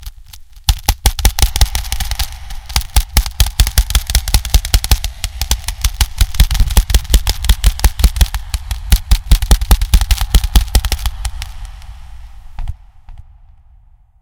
Semi-Auto Rifle Simulation, created using Zoom H1. Artificial gun shots to simulate a semi-auto weapon in battle. Mic capsules were tapped with a Popsicle stick to create this sound. Added Pop Compression filter for a Theater like experience in post. A little credit wouldn't hurt. Thank you and stay tuned for more improving sounds.
Sniper, pistol, warfare, m16, Realistic, military, M60, shooting, War, Gunshot, firing, 12-guage, rifle, weapon, attack, gun, Battle, shot, Echoe, Shots, Gun-shot, mg42, army